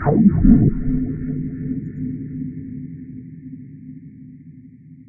there is a long tune what i made it with absynth synthesiser and i cut it to detached sounds